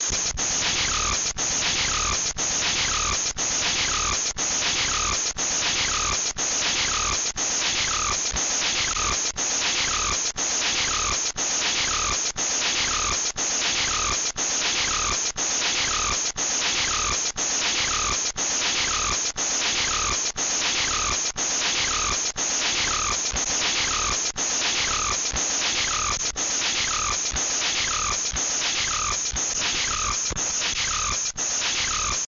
circuit bent keyboard
circuit, bent